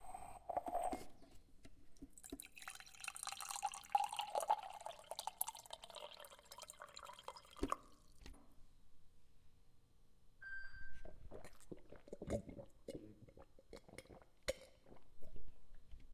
bebendo agua
tirando agua do filtro de barro
audio, sterio